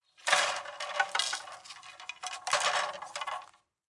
Direct exterior mic recording of arrows being picked up/set down
Recorded on rode shotgun mic into Zoom H4N.
De noised/de bird atmos in RX6 then logic processing.